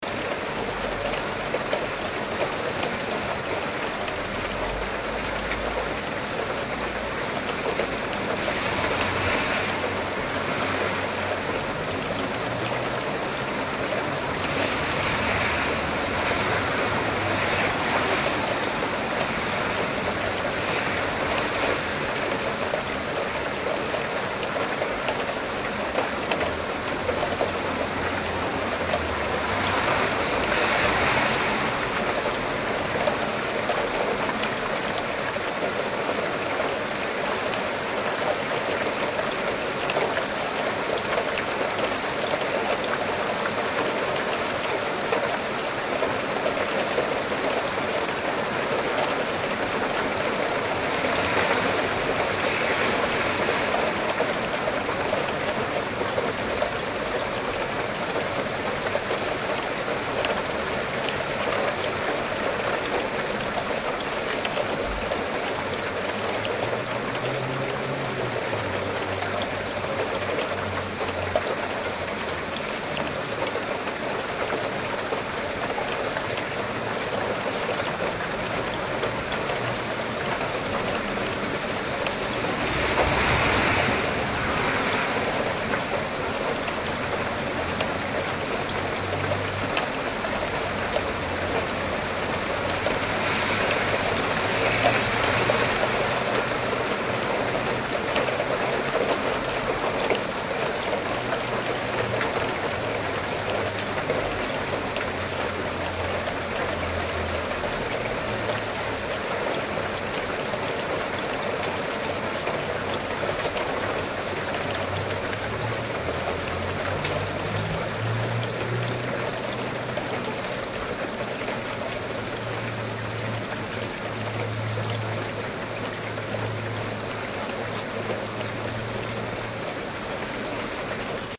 Heavy Rain w/ Cars
A short clip I recorded with a Samsung R10 camcorder. I opened a window and placed the camera on my window ledge during a pretty heavy rain fall, includes cars going past.
torrential-rain, shower, cars-going-past, field-recording, rain, heavy